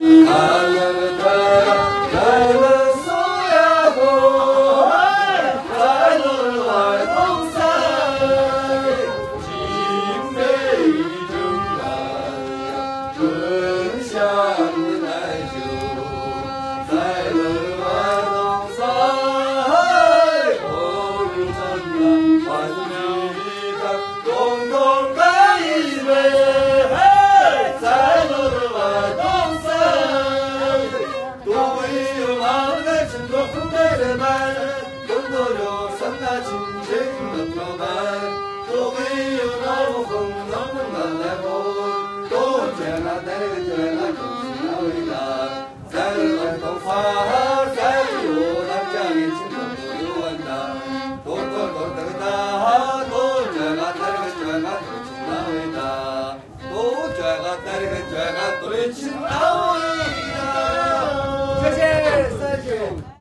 Mongolian Welcoming Song, recorded Inner Mongolia.
Asia, Buryat, chorus, duu, ethno, folk, folklore, Genghis, ger, grassland, horse, inner, Khan, khuur, Mongol, Mongolia, Mongolian, morin, music, nomad, Oirat, singing, song, steppe, traditional, urtyn, welcome, yurt